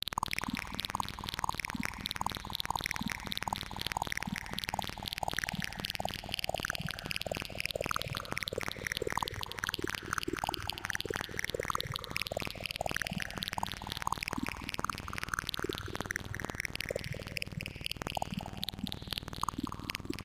Acidic Squeaky Loop 190
Part of hitech trance tune i'm working on, made in Harmor with a few added effects
190bpm, Acid, FX, Psy, Psytrance, Squeak, Squelch, Techno